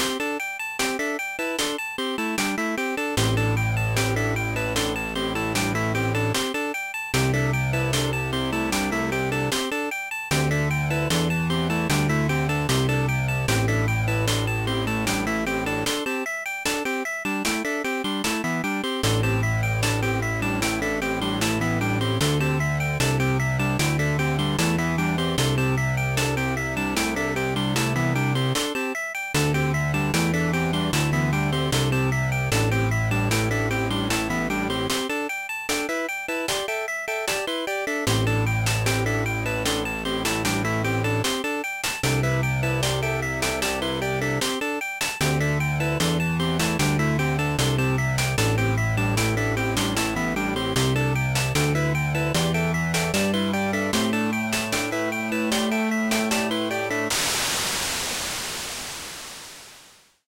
Pixel Song #21
Music, Pixel, Short